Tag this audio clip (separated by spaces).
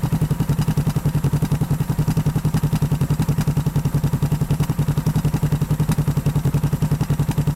bike engine honda idling japanese japanese-bike motor motorbike motorcycle twin-bike